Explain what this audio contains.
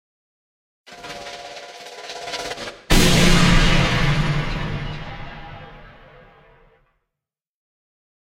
Large Laser Cannon
Effect, Laser, Mass